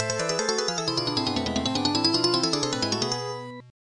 09-Fighter Captured!
This jingle, created with OpenMPT 1.25.04.00, is what I will use in a custom game creation. The game is "Galaga Arrangement Resurrection." The jingle plays after your fighter is captured.